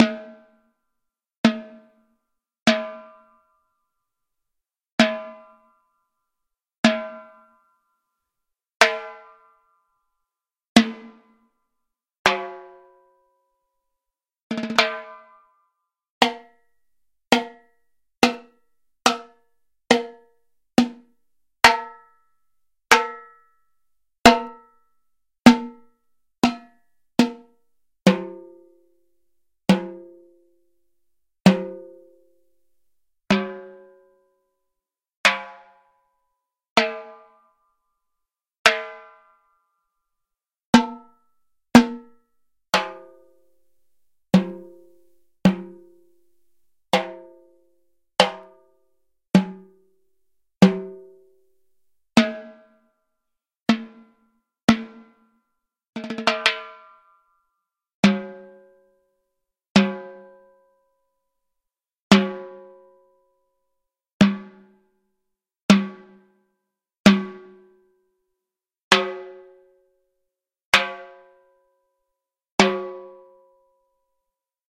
50 Timbales Hits
50 timbales samples in about a minute fifteen. Just single samples, not a beat or loops et cetera.
bongo, boom, conga, rasta, kettle-drum, jungle, fill, percussion, bank, reggae, slap